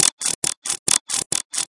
CRICKET PERCUSSION LOOP 1 BAR 140BPM
This percussion loop sounds like crickets chirping. 1 bar, 140BPM.
cricket, insect, percusson